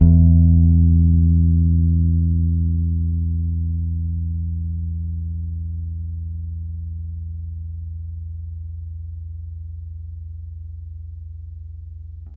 This is an old Fender P-Bass, with old strings, played through a Fender '65 Sidekick amp. The signal was taken from the amp's line-out into the Zoom H4. Samples were trimmed with Spark XL. Each filename includes the proper root note for the sample so that you can use these sounds easily in your favorite sample player.
string, p-bass, sidekick, bass, multisample, fender, finger